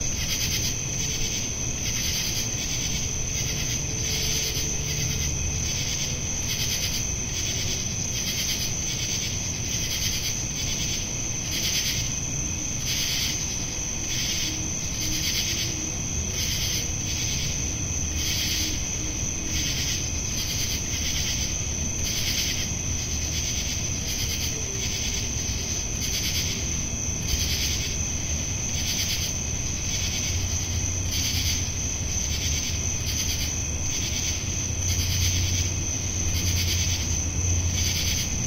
Nightime Noises - Outside
Sounds of insects and crickets at night. Recorded after a rain. Distant sounds of music from restaurant patio and road noise.
Recorded with Zoom H2.
ambiance, crickets, field-recording, insects, nature, night, summer